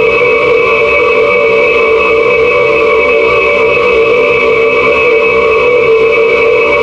atmosphere noise 004
White noise processed with FIR-filter.
atmosphere, engine, film, horror, industrial, noise, sci-fi